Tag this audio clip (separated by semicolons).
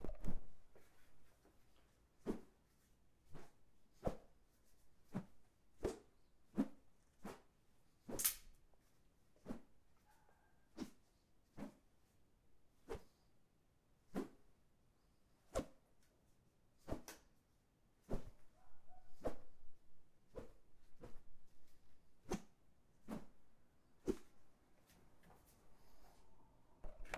swash; swosh; woosh; swish; stick; whoosh